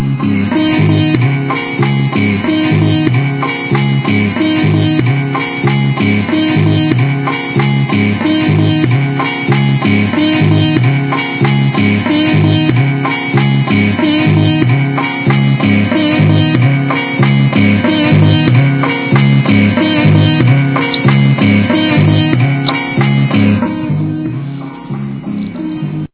9 5 06 big loop
this is an upbeat loop created by a record skipping on a broken turntable.